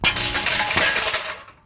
bicycle crash 4
Recorded for a bicycle crash scene. Made by dropping various pieces of metal on asphalt and combining the sounds. Full length recording available in same pack - named "Bike Crash MEDLEY"
bike, clang, drop, fall, impact, machinery, metal, metallic